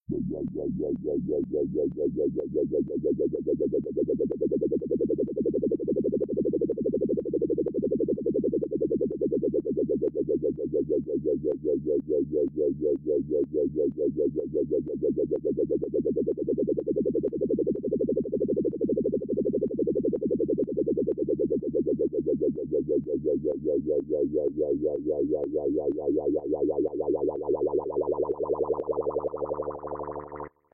lfo wobble
A long single note with LFO modulation of pitch, filter and pan. Typical synthesizer 'wobble' effect.